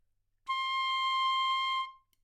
Part of the Good-sounds dataset of monophonic instrumental sounds.
instrument::piccolo
note::C
octave::6
midi note::72
good-sounds-id::8279
C6, good-sounds, multisample, neumann-U87, piccolo, single-note